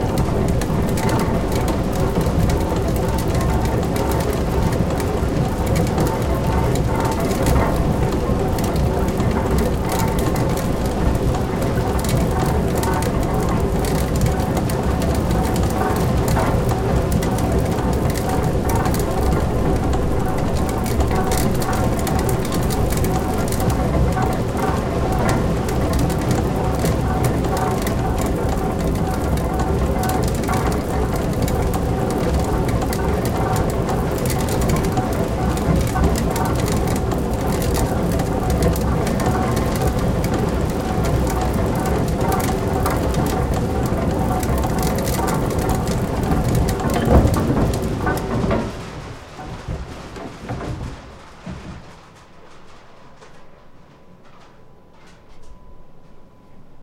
Grist Mill Process Stop
corn, farm, flour, grind, grist, industrial, machine, meal, mill